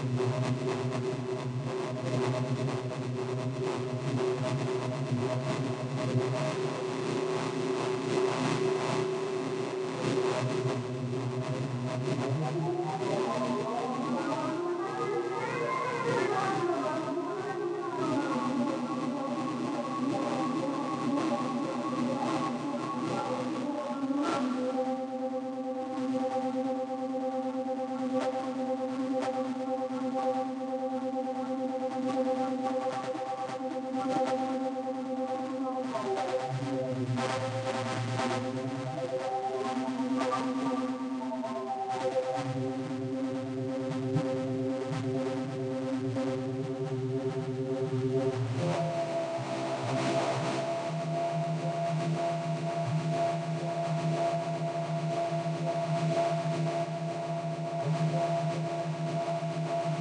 Feedback-System-with-distortion 18-Jul-2010
Sound created in Ableton Live by a feedbacking system. Can't remember any details.
The sound is harsher than previous sounds in the pack. I think there is some sort of pitch changing effect and a distortion in the feedback loop.
I played with volumes and effects parameters in realtime to produce this sound.
If trying something like this always place a limiter on the master channel... unless you want to blow your speakers (and your ears) !
Created Jul 2010.